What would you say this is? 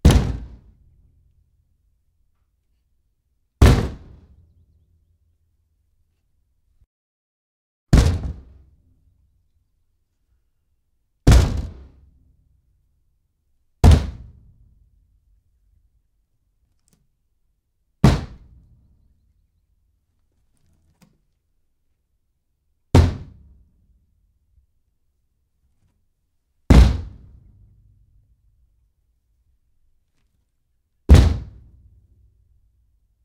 door wood hit +window rattle slam bang various
bang, door, hit, rattle, slam, window, wood